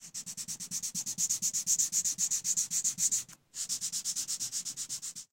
Dedos deslizándose sobre papel